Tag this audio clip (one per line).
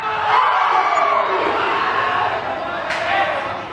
fans
football
competition
sport
field-recording
soccer
goal
cheers
world-cup
voice
game
spanish
match
shouting